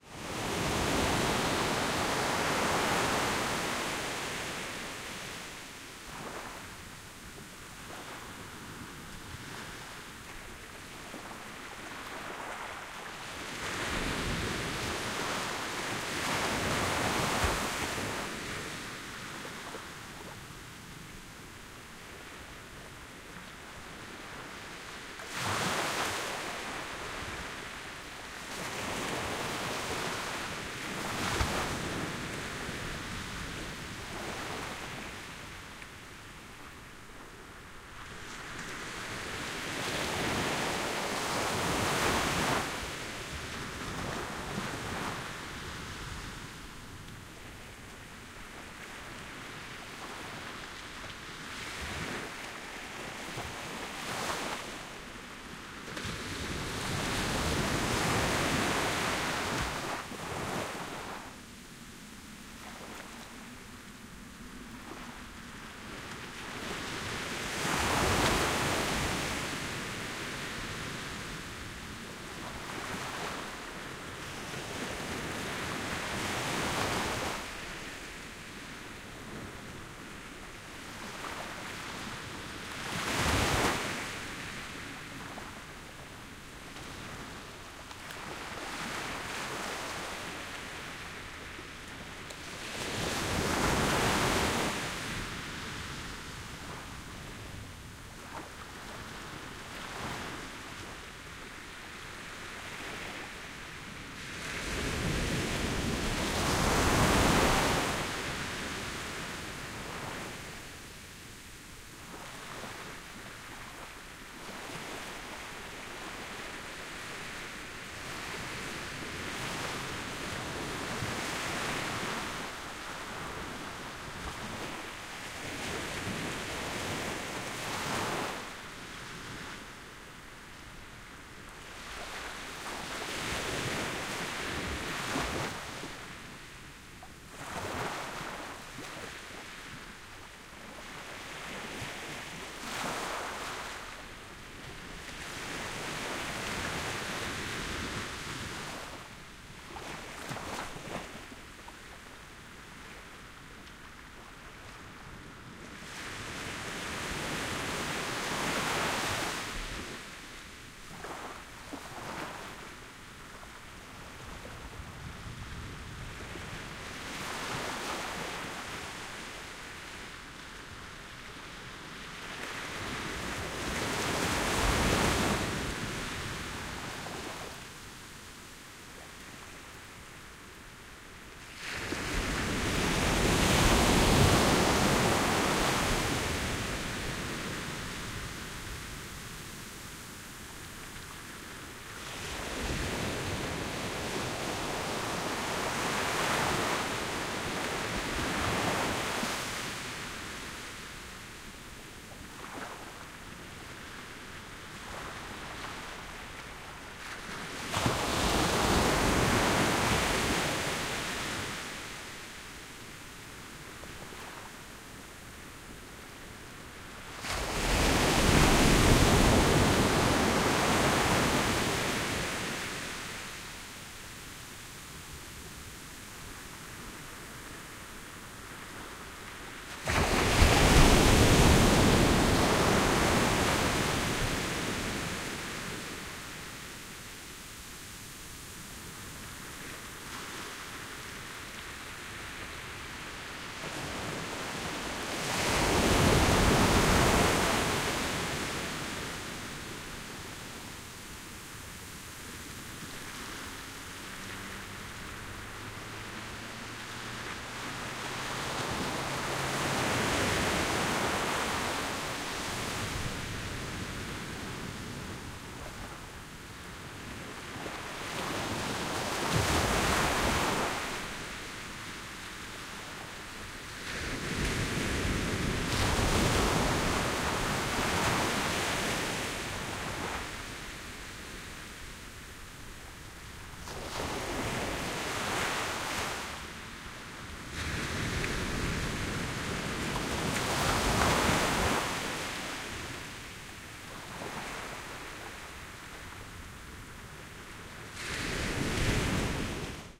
Mau U Mae Beach Waves
Stereo ambient field recording of the sandy shore break at Mau `u `Mae Beach on the Big Island of Hawaii, made using an SASS
Beach, Field-Recording, Ocean, Sand, Sandy, SASS, Sea, Stereo, Tropical, Water, Waves